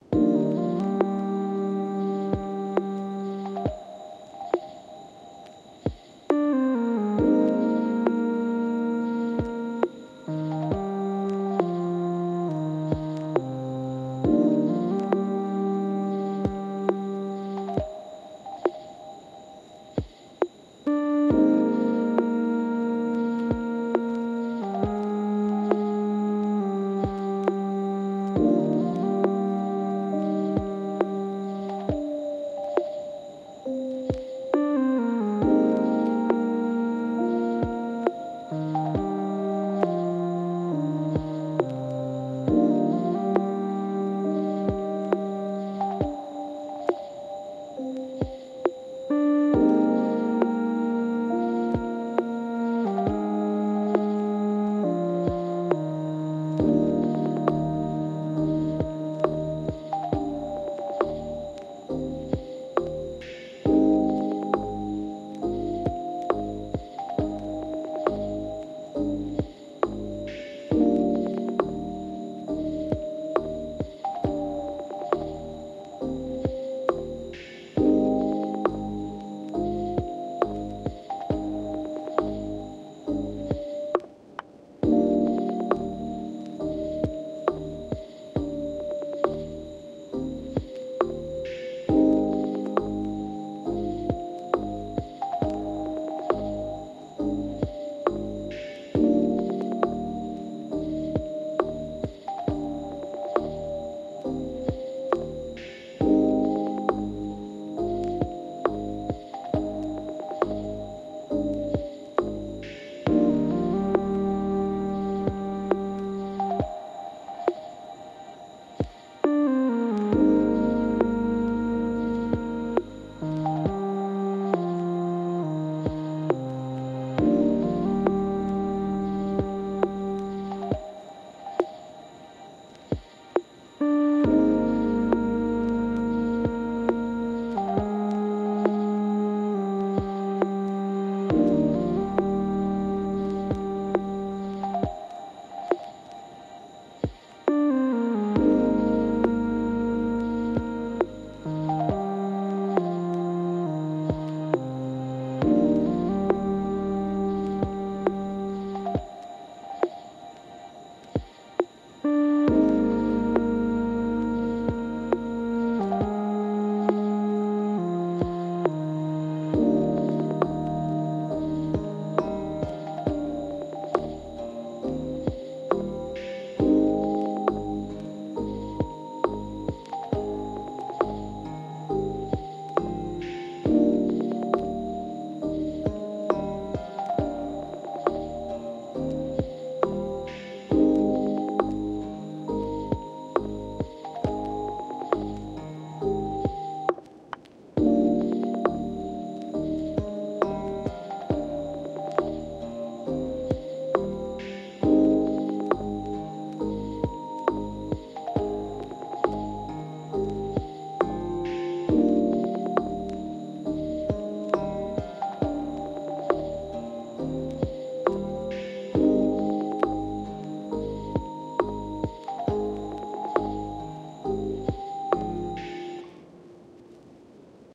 Lo-fi Music Guitar (loop version)
Genre: Lo-Fi
Track: 56/100
Super relaxing lo-fi music.
guitar, loop, bass, background-music, relaxing, lo-fi, beat